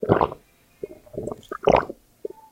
Gulping, drinking water, I think I was holding the sound recorder to my throat. The sound was recorded in my house in Florida, using a Sony IC Recorder, processed in FL Studio to remove noise.
gulp, Sony-IC-recorder, swallow, throat